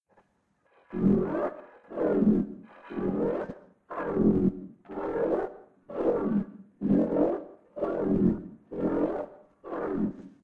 Just a little sound effect I did for my animation. I just used my voice and some editing skills in Adobe Audition CS6 and Audacity.